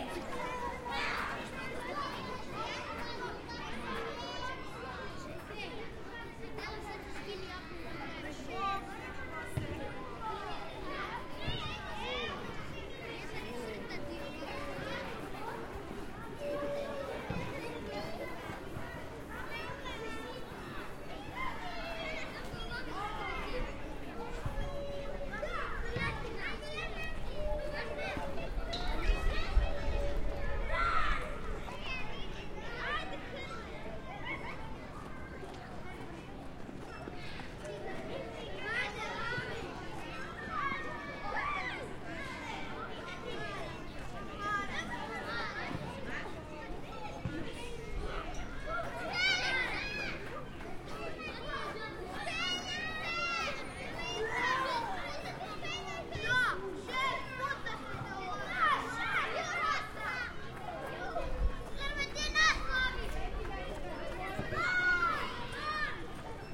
CHILDREN WALLA PARK park
CHILDREN WALLA PARK AMBIENT
ambient, children, park, walla